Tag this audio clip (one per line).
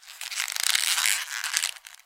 Belt
Creek
Leather
Squeak